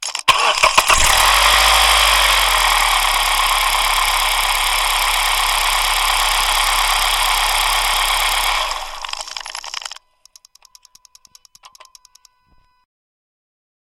Engine Start
a DIY surface microphone pressed up against a Mazda 3 engine and starting it up. Sounds quite thin compared to if it was recorded off the surface.
engine, vibrate, vibrating